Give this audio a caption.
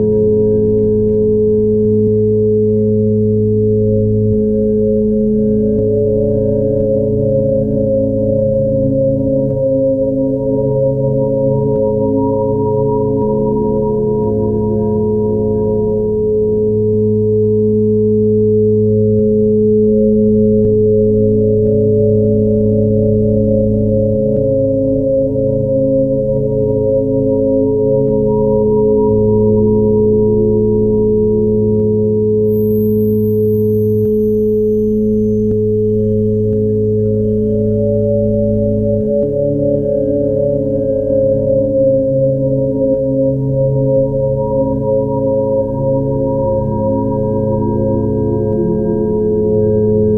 sad pattern drone
The sounds in this pack were made by creating a feedback loop of vst plugins in cubase. Basically, your just hearing the sounds of the pluggins themselves with no source sound at all... The machine speaks! All samples have been carefully crossfade looped in a sample editor. Just loop the entire sample in your sampler plug and you should be good to
go. Most of the samples in this pack lean towards more pad and drone like sounds. Enjoy!
processed, generative, sequence, pattern, loop, sad, electronic, drone, pad, ambient, atmosphere